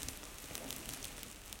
Cardboard Box Rustle 3
A friend moving his hands around a cardboard box. The box had tape on it, hence the slight rustle.
crumpling, tape